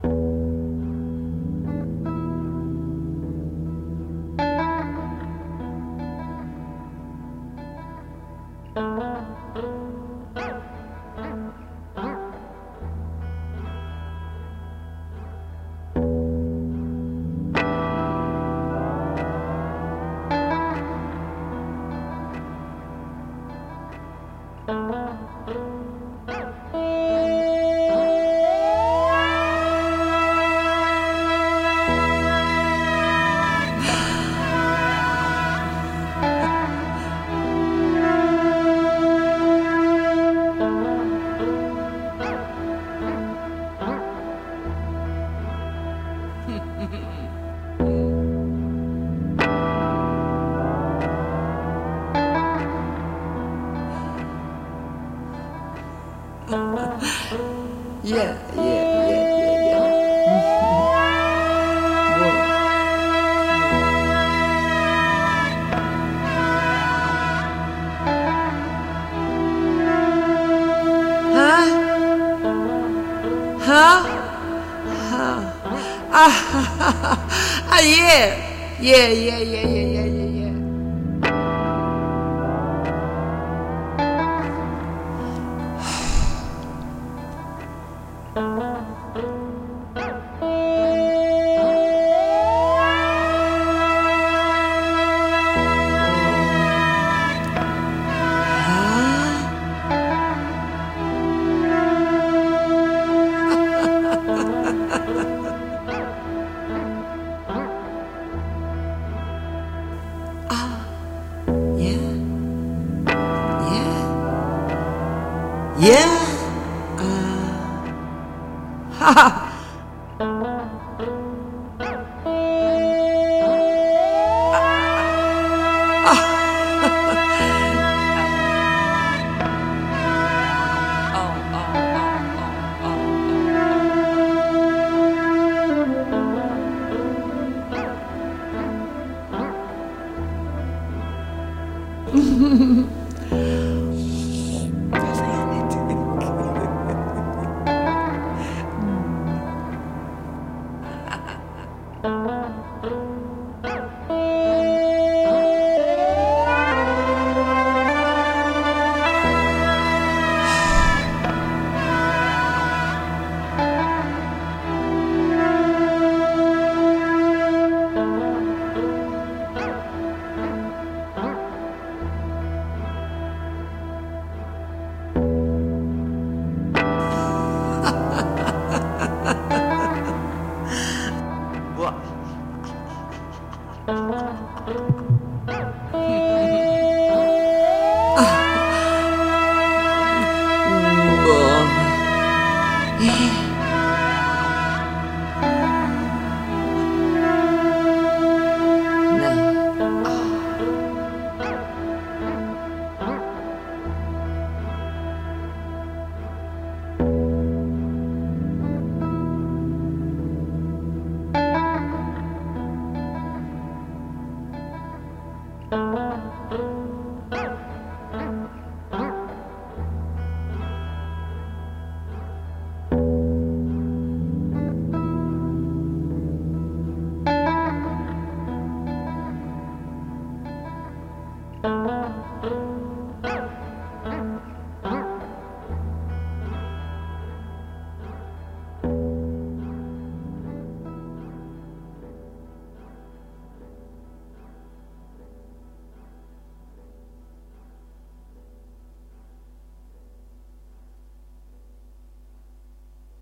I combined the two, to be used as a background music for one scene in my performance.
Thank you!